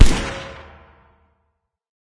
Sound of a gunshot and the resulting "Pang" it makes as it hits metal.